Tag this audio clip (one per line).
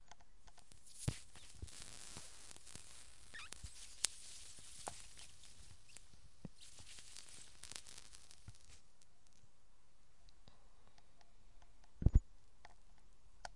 breath
smoking
smoke